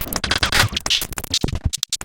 tweaknology glitchsquelch01
made with black retangle (Reaktor ensemble)
this is part of a pack of short cuts from the same session
click, computer, cyborg, digital, effect, electro, future, fx, glitch, hi-tech, lab, mecha, mutant, noise, robot, sci-fi, soundeffect, soundesign, transformers